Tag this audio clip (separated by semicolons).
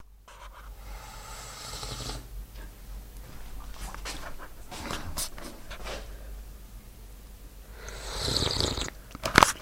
dragnoise; suck